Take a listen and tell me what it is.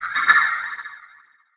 Mystic noisy distant thing... Created in Virtual Waves!
industrial, processed